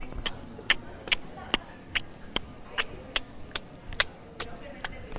AmCS JH ME08 'k-'k-'k
Sound collected at Amsterdam Central Station as part of the Genetic Choir's Loop-Copy-Mutate project